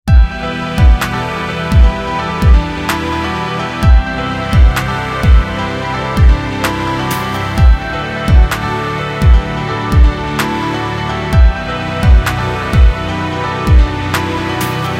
Strings & Piano
A chill instrumental loop with somewhat heavy drums backing it. Loop was created by me with nothing but sequenced instruments within Logic Pro X.
128-bpm, drums, chill, instrumental, strings, electronic, music, soft, loop, loops, ensemble, logic-pro, piano